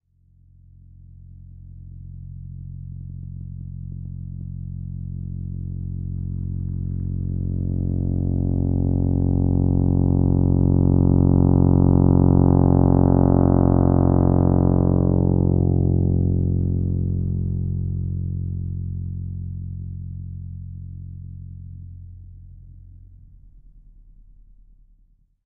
An effected trumpet
trumpet, fx